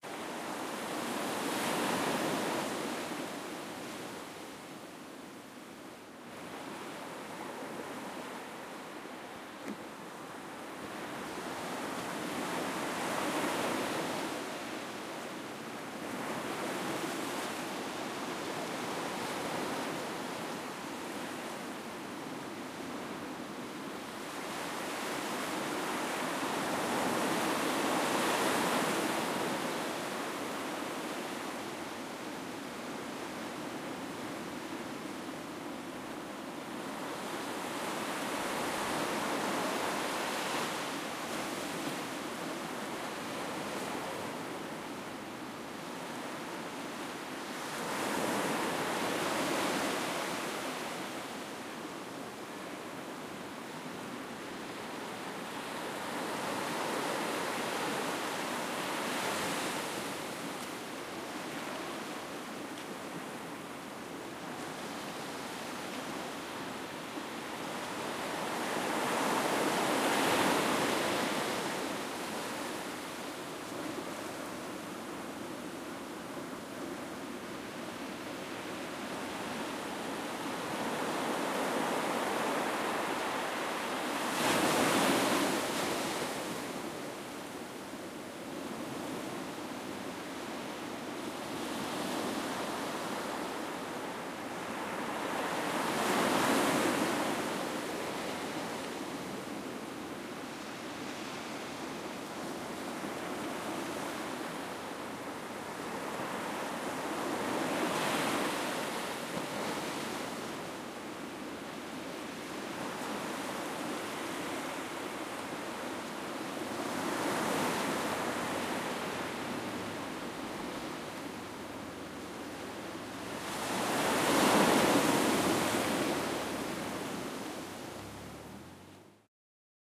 4meter from seashore
h4n X/Y
sea, wind